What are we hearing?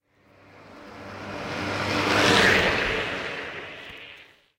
Vehicle passing - driving car - lorry - van - bus - tractor - truck
Vehicle passing
Recorded and processed in Audacity